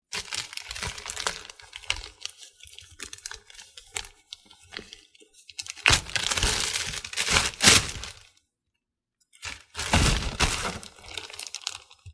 Victoria Boche-Mus152-Foley-Lunch bag
This is the sound of placing lunch in a paper bag
kitchen, bag